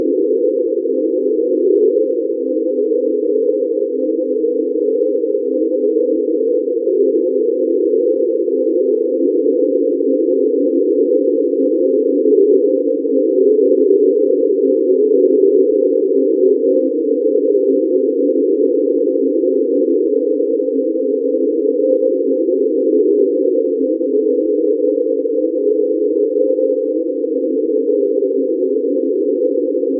IMG 4333 1kl 30
the sample is created out of an image from a place in vienna
image, synthesized, Thalamus-Lab